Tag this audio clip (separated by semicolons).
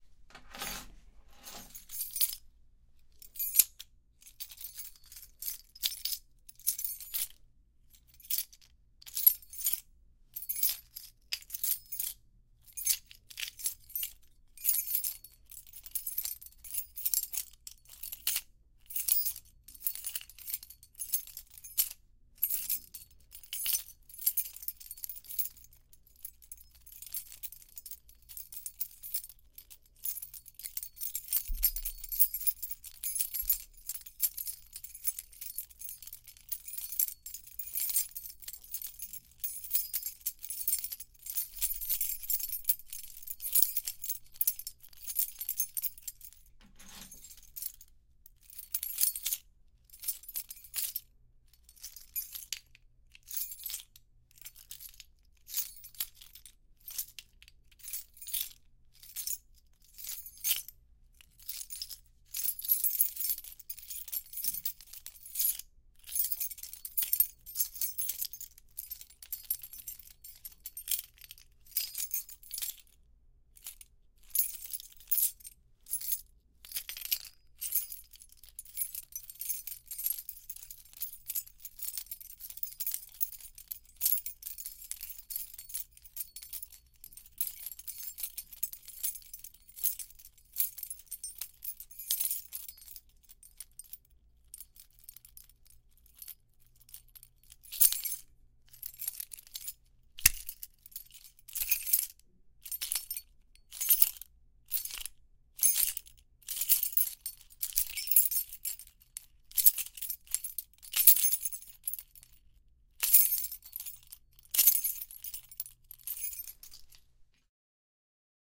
keys; different